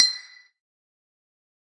EQ'ed and processed C1000 recording of a good old metal bin. I made various recordings around our workshop with the idea of creating my own industrial drum kit for a production of Frankenstein.
metal, steel, clang, ting, scaffold, hit